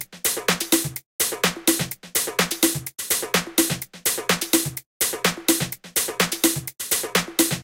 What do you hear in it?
House hihats loop.